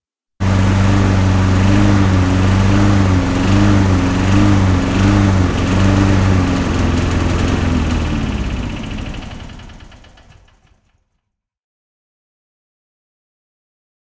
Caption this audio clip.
Estlack mower runs out of gas
recorded with (Studio Projects) condenser mic, mini phantom powered mixer (Behringer) and a (fostex) 4 track recorder. Location - Garage. Sound of lawnmower running out of gas.
lawnmower, engine, internal, combustion, gas